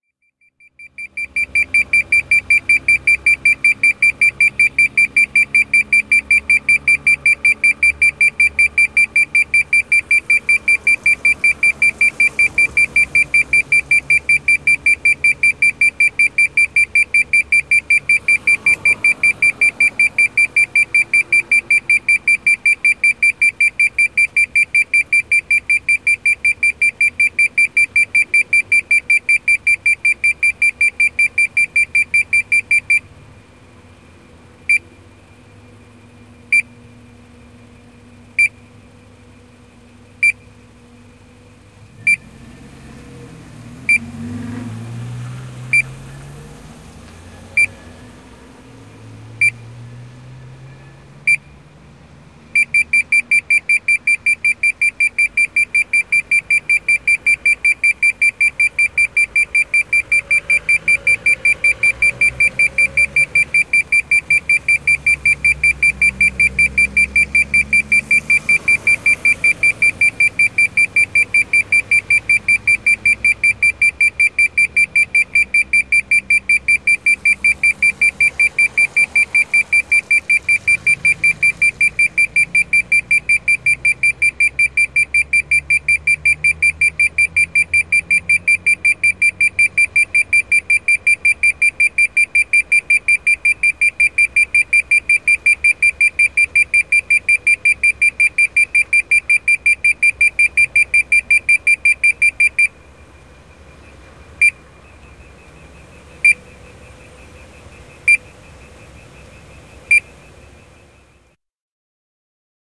Liikennevalot, ääniopaste / Traffic light, audible signal, piping, fast bleep - green for pedestrials, slow - red light, a close sound, traffic in the bg
Piippaava merkkiääni, nopea - vihreä jalankulkuvalo, hidas - punainen valo. Äänitetty läheltä. Taustalla liikennettä.
Paikka/Place: Suomi / Finland / Tampere
Aika/Date: 1991
Audible-signal, Bleep, Field-Recording, Finland, Finnish-Broadcasting-Company, Liikenne, Liikenneturvallisuus, Liikennevalot, Piping, Road-safety, Soundfx, Suomi, Tehosteet, Traffic, Traffic-lights, Yle, Yleisradio